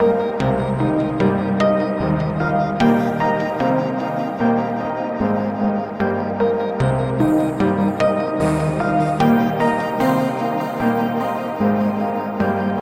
A scene from a session made with my modular synth system